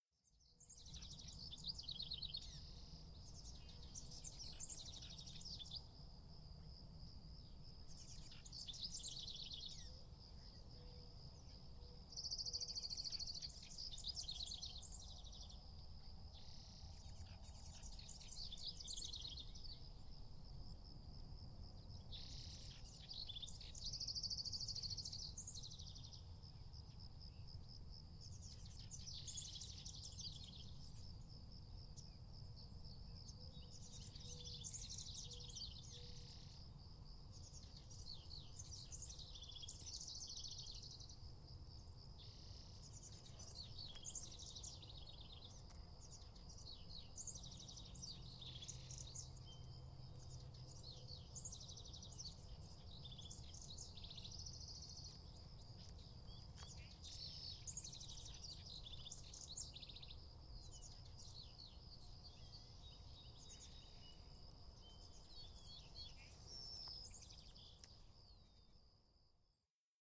Ambient Birds and sounds recorded in a meadow using a Rode NT3 and Zoom H6.